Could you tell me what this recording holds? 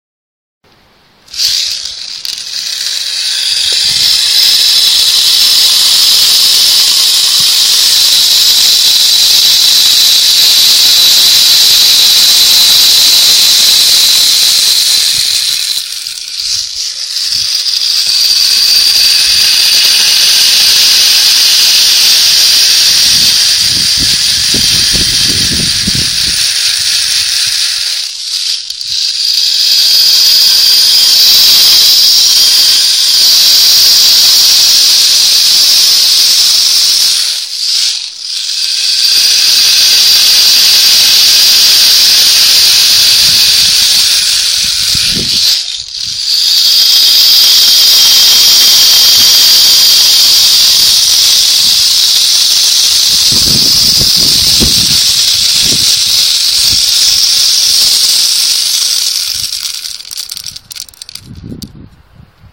plastic rainstick 004
Sound of small metallic balls passing through a plastic rainstick.
fx, pal-de-pluja, palo-de-agua, palo-de-lluvia, percussi, percussion, pl, plastic, rain, rainstick, shaker, sound-effect, stic, stico